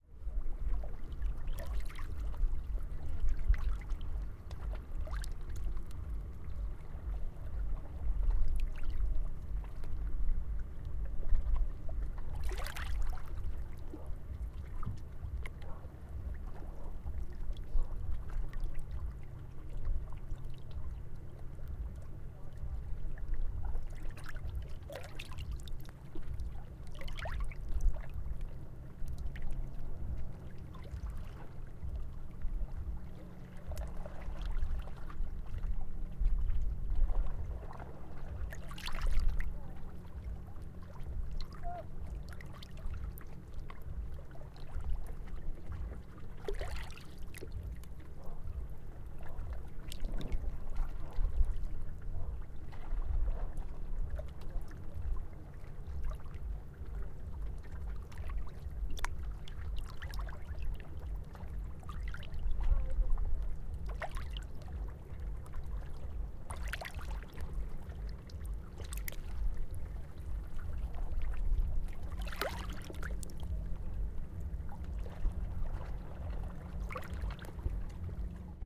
Calm Seashore
Recording of the seashore sound.
Calm, Field, Field-recording, Peaceful, Sea, Seachore, Water, Waves